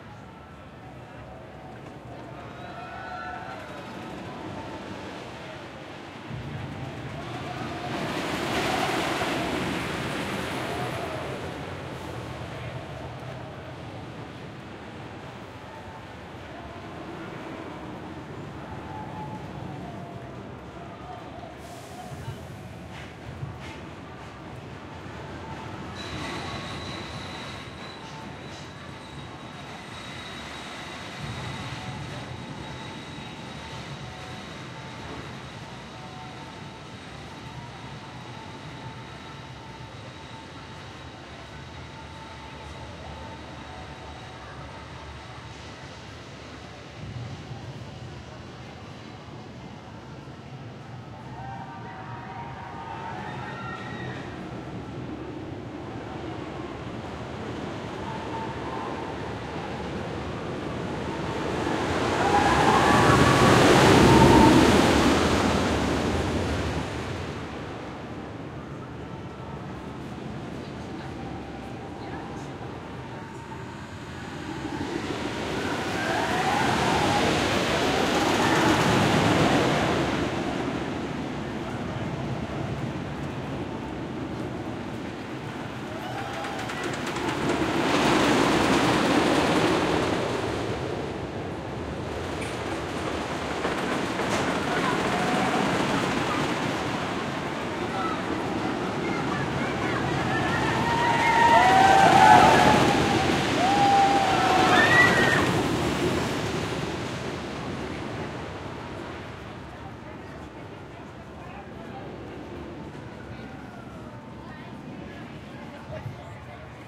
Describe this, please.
Wooden coaster
The sounds of a wooden roller coaster.
roller, amusement, Achterbahn, themepark, atmosphere, wooden, coaster, park, thrill, environment, wood